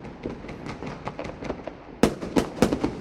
delphis FIREWORKS LOOP 20 MO
Fireworks recording at Delphi's home. Inside the house by open window under the balkony Recording with AKG C3000B into Steinberg Cubase 4.1 (mono) using the vst3 plugins Gate, Compressor and Limiter. Loop made with Steinberg WaveLab 6.1 no special plugins where used.
c4, fireworks, akg, c3000b, fire, delphis, ambient, shot, thunder, explosion